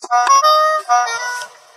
toy saxophone (2)
Sound of a toy which i recorded in a toyshop using the mic on my phone. Chopped, cleaned and normalized in Adobe Audition.
lofi, saxophone, toy